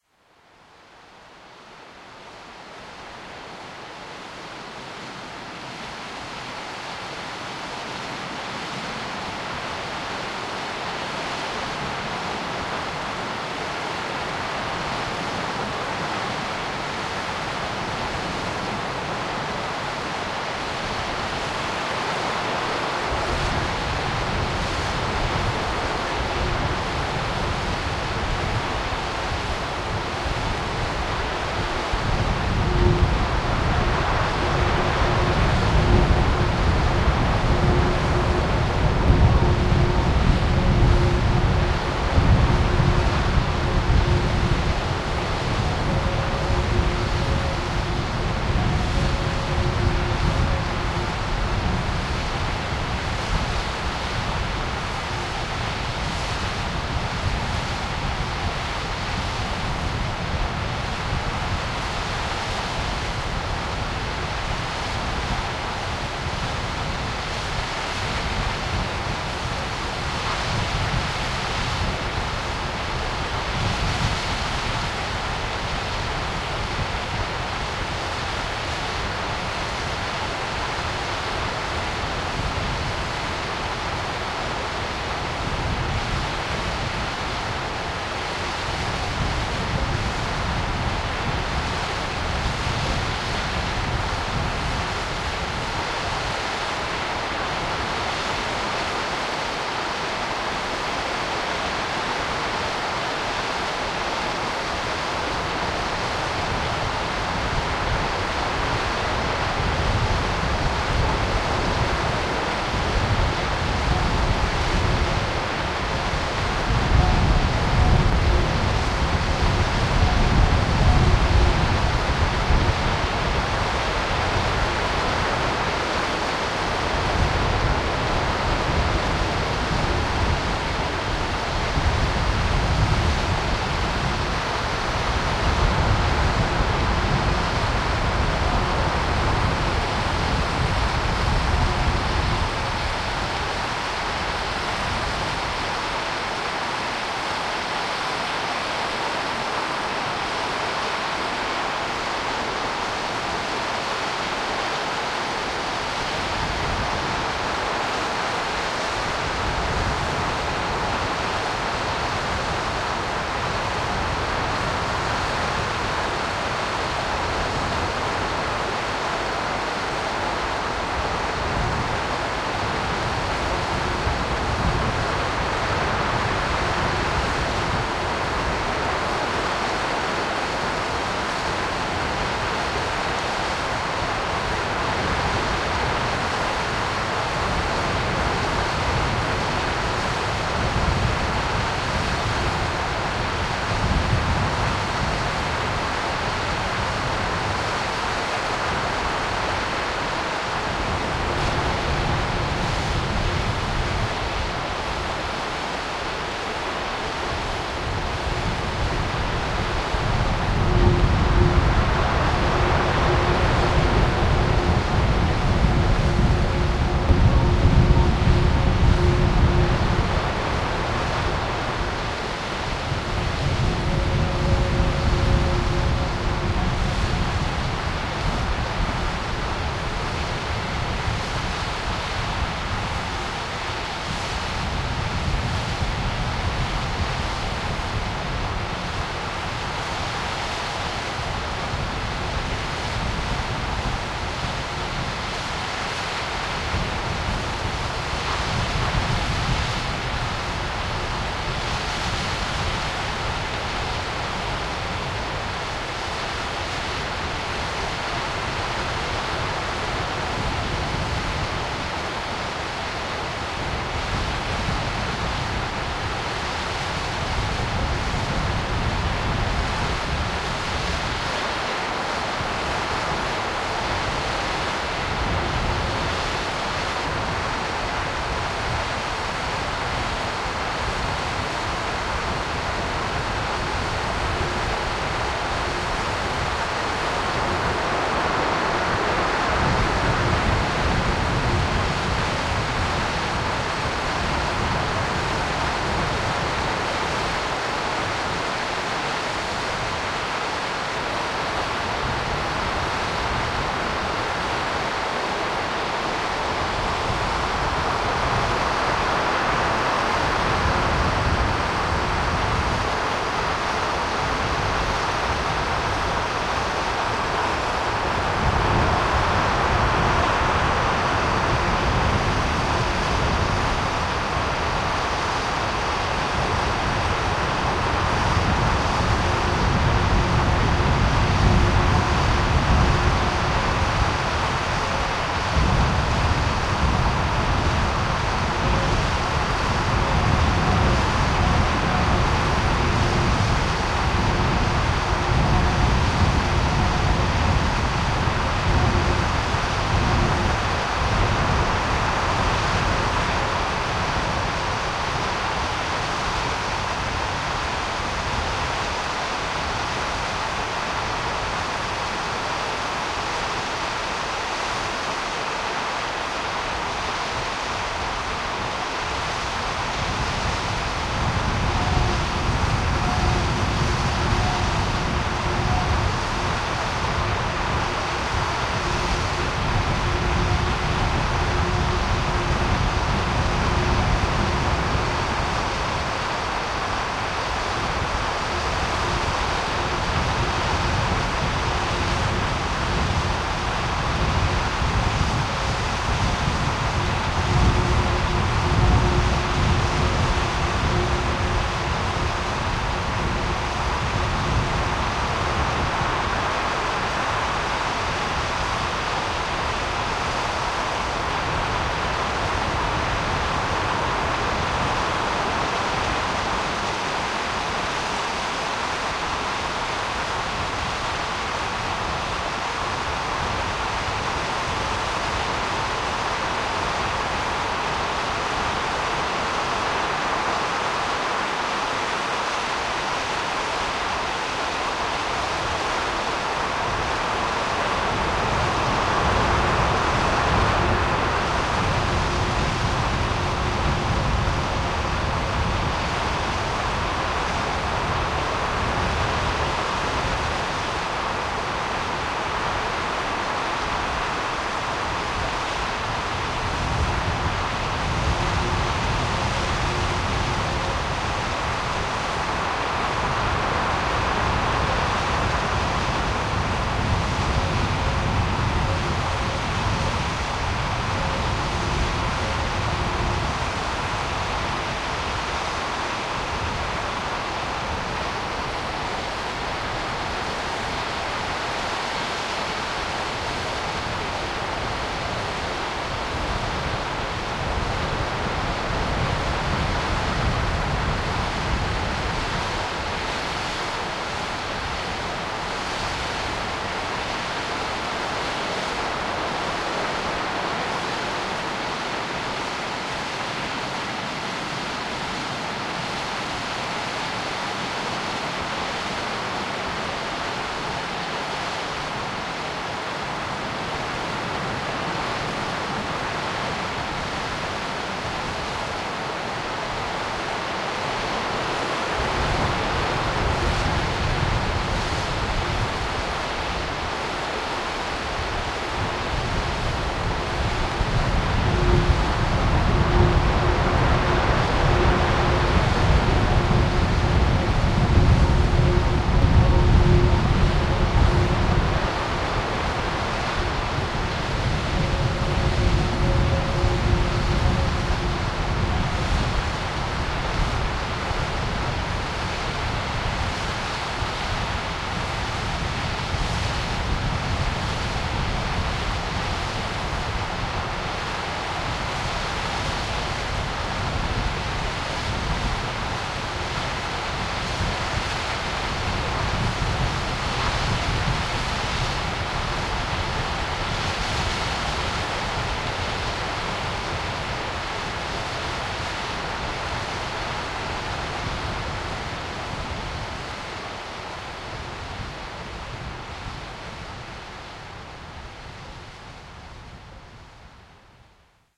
06.Mealt-Falls
Recording of the Mealt Falls (waterfall falling into the see from high cliffs on North Skye). Sound of the falls and sea underneath combined with haunting piping of wind entering the railing surrounding the viewpoint.